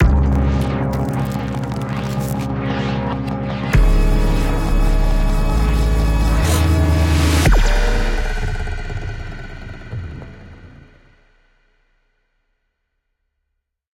What a dramatic mystery theme would sound like in the year 2100.
Created using sampling, analog synthesis, and granular synthesis.